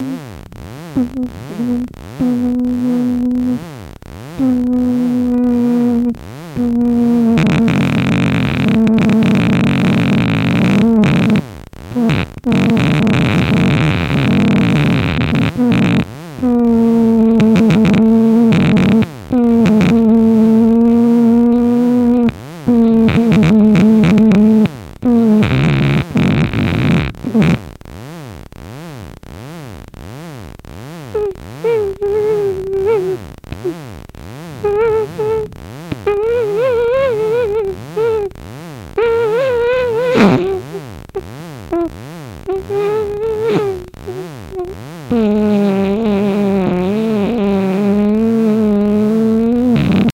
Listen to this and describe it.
Your body is investigated by the unknown while you are sleeping
sleep, unknown, body